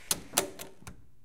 A door opening in wet
wet-door, door, door-open